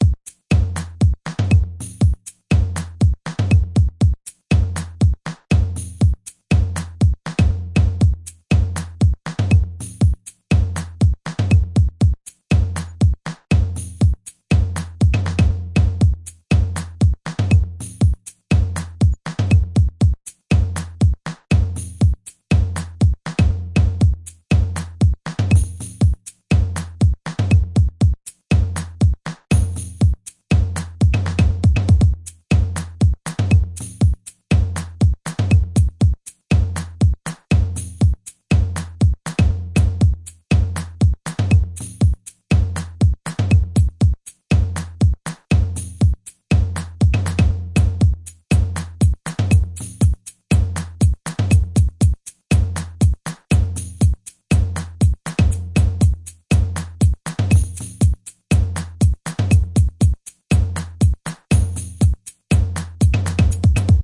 Another drumloop i created for my intern assignment!
Its a nice and static drumloop thats easy to follow.
Good use for voiceover scenes or other background purposes.
Rythm, drums, loop, percussion, drum